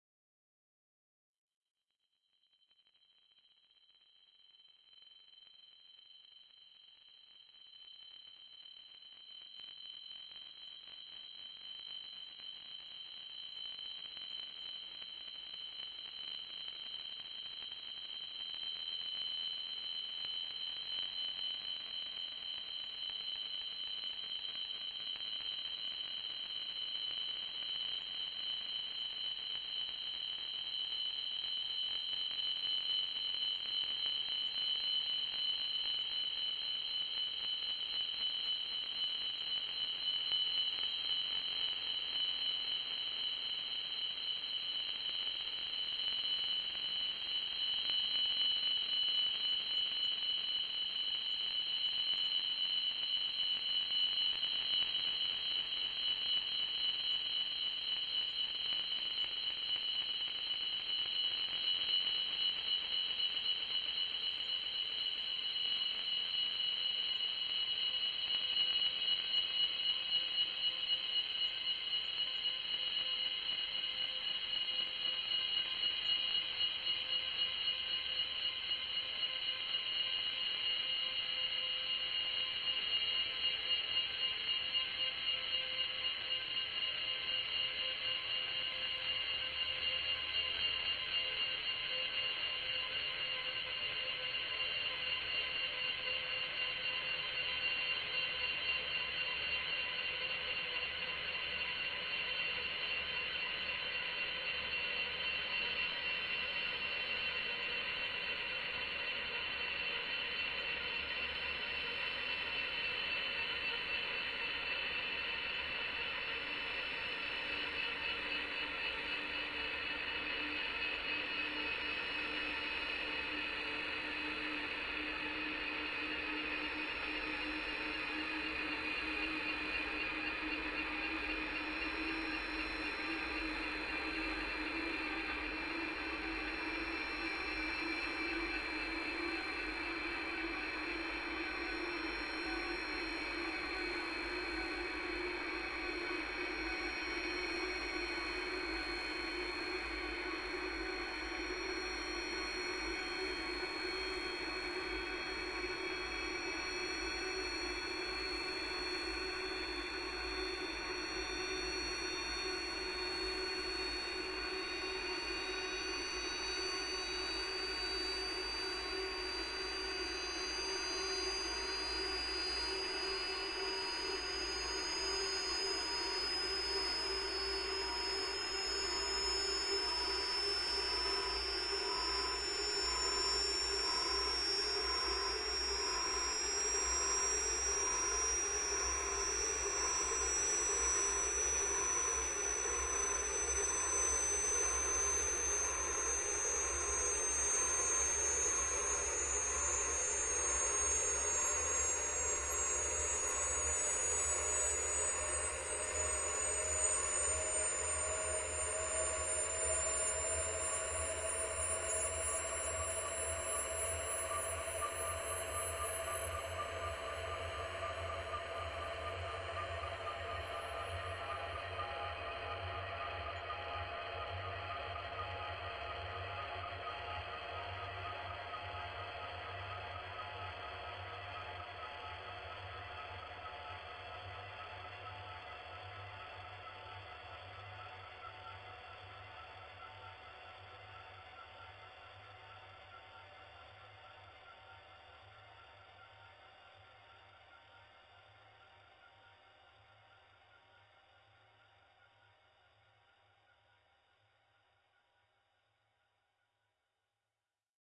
sample to the psychedelic and experimental music.
AmbientPsychedelic, Noise, ExperimentalDark